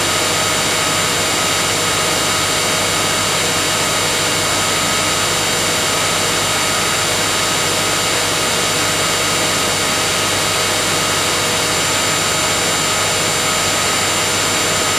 radio noise 1
Radio noise recorded in air band.
icom, noise, ic-r20, 2m-band, radio, airband